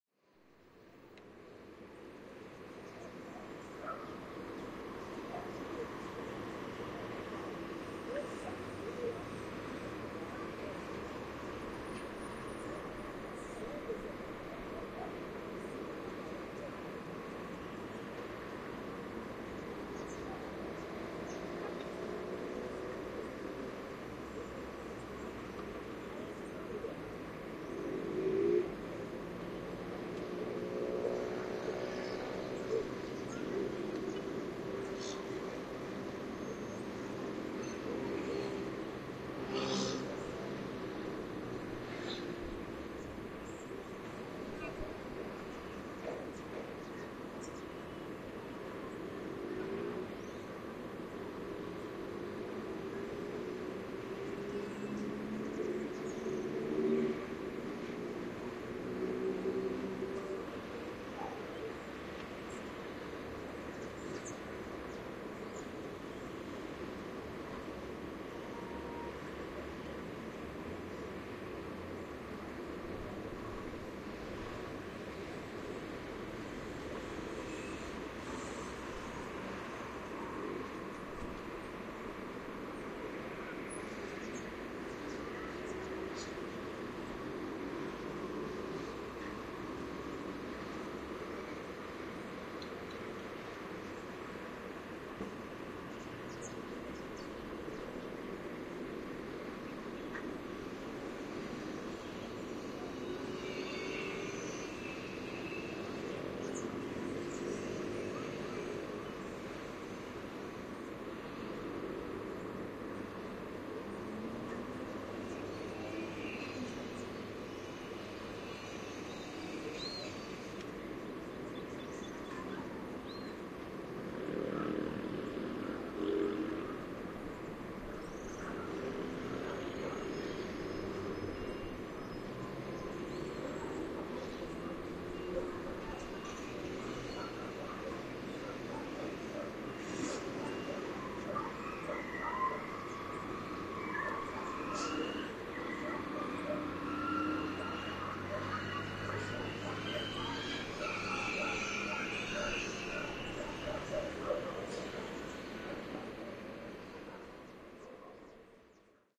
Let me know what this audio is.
Calm street, with some cars and motorbikes, and ambient sound of birds
street, urban